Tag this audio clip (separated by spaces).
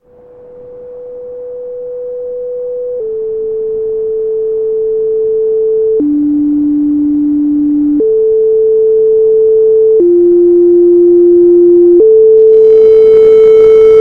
Alarms Audacity Emergency Firefighter Free Police Sound